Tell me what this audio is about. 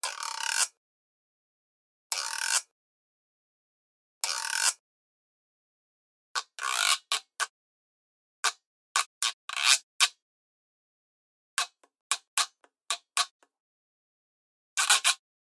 Obviously I'm not a percussionist. These are just a few takes I made with a borrowed plastic guiro. After a few single samples I included a clumsily played "quintillo" rhythm.
GEAR:
Neumann TLM-102
Arturia AUDIOFUSE Interface
Mono